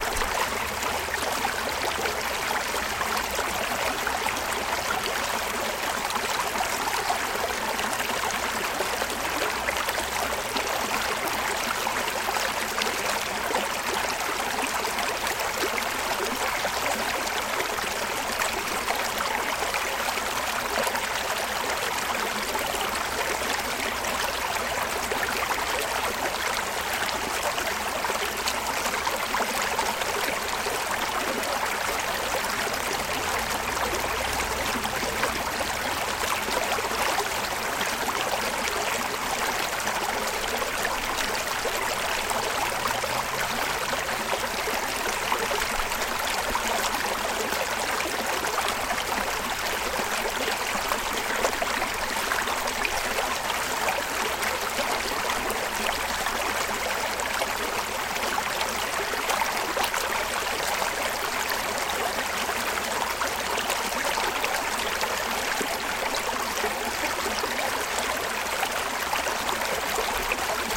A very relaxing river going down stream.
river, flowing, flow, relaxing, liquid, creek, water, brook, stream